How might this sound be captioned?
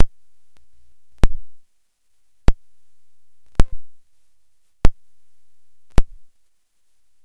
THIS IS NOT A PERFECT LOOP!it was sampled by me inserting a jack over and over into a Casio SK-5. I did the beats by ear, while listening to a metronome set to 100 BPM. it is extremely close though. you don't have to site me if you use this sample in your work, I don't care.